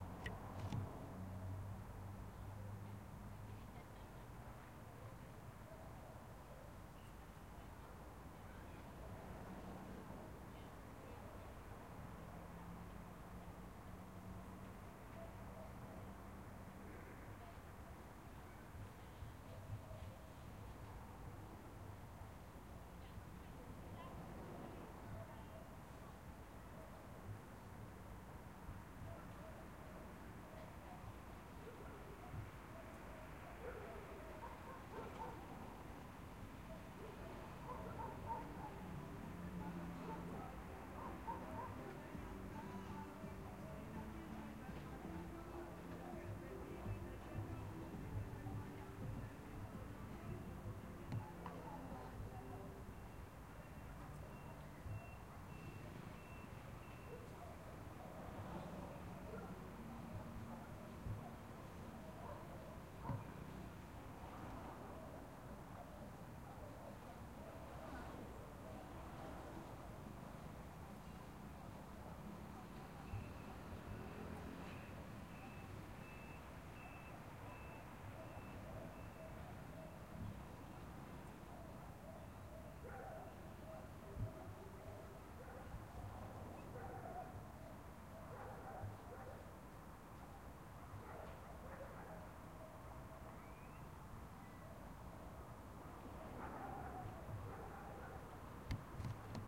Calle de noche en Santiago de Chile
Sound recorded on the street of Santiago de Chile at night